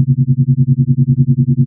SpaceDub 003 HF hearted
Cool dub/ambient-dub SFX synthesized in Audacity.